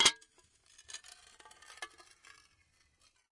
Small glass plates being scraped against each other. Plates tap and then scrape with both smooth and rough sounds. Close miked with Rode NT-5s in X-Y configuration. Trimmed, DC removed, and normalized to -6 dB.
glass, scrape, noisy, plate